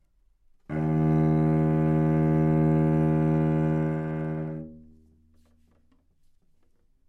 overall quality of single note - cello - D#2
Part of the Good-sounds dataset of monophonic instrumental sounds.
instrument::cello
note::Dsharp
octave::2
midi note::27
good-sounds-id::1943
dynamic_level::mf